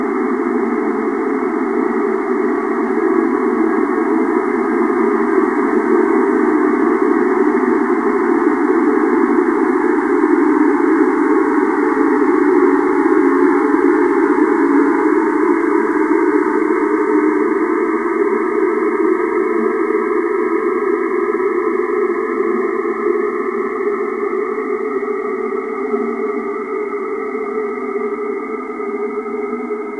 the sample is created out of an image from a place in vienna